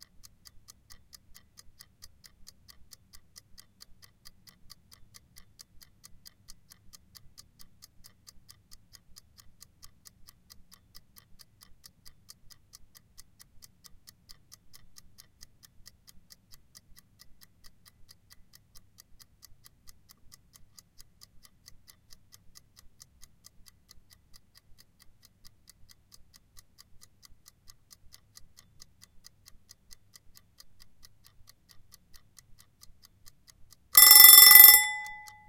Kitchen timer - ticking and ringing
A kitchen timer, ticking and ringing.
Recorded with a Zoom H1.
Kitchen, ringing, ticking, timer